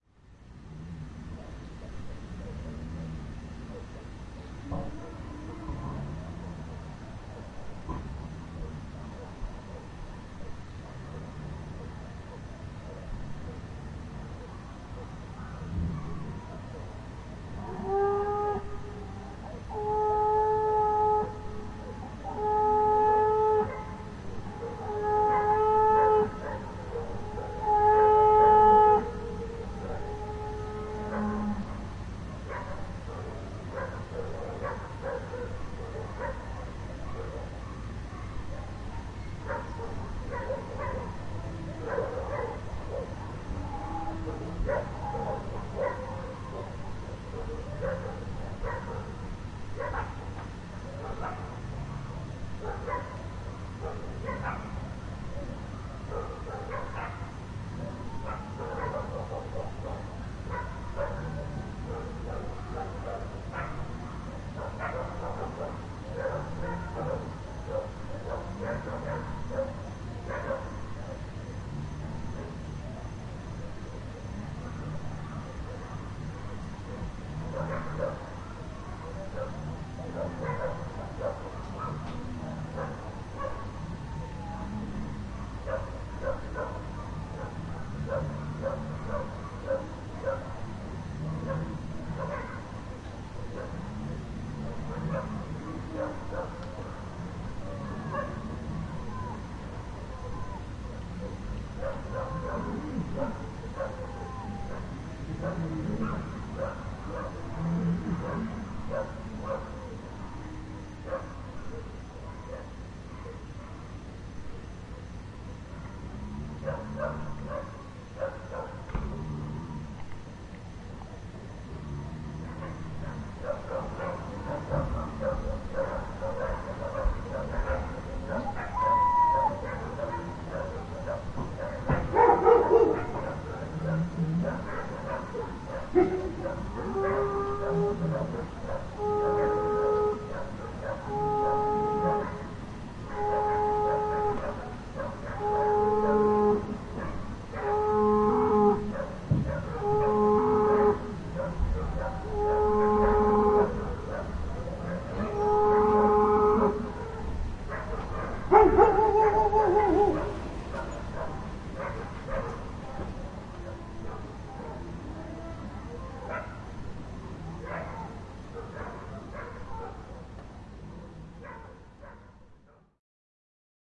Cattle and Dog Invasion
On this visit to Yuendumu, a community in the Tanami Desert, I couldn't resist recording this atmos. The bores had been switched off and the watering holes were drying up, so the cattle were forced to come into the community to get water at night.